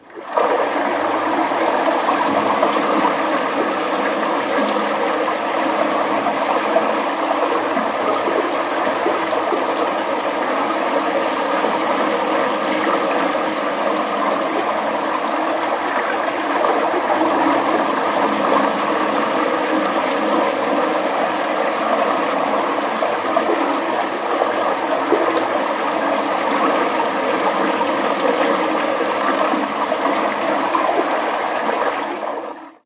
Stream created pouring down water in a tub. It's good if you are looking for the sound of a stream without the nature environment.